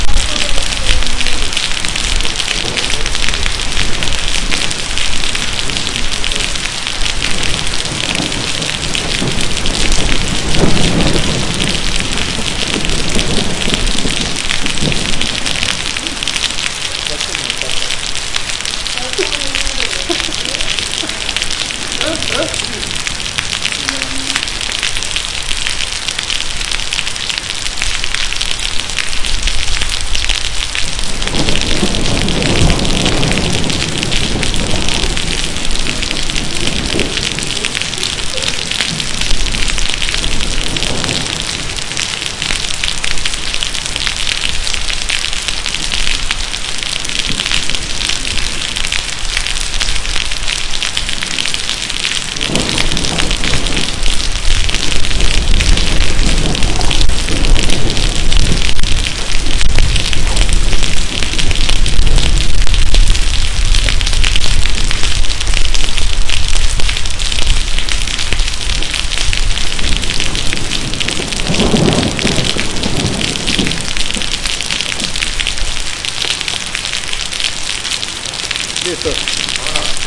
lluvia con truenos, algunas voces en ciertos momentos... storm with somw thunders
lluvia, rain, ambient, nature, storm